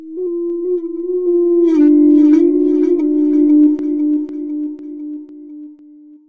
Various flute-like sounds made by putting a mic into a tin can, and moving the speakers around it to get different notes. Ambient, good for meditation music and chill.